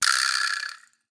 Vibraslap Very Short
A 'Latin Percussion' vibraslap recorded with an Audio Technica AT2035 via MOTU Ultralight MK III using Apple Sound Tracks Pro. This is a very short decay.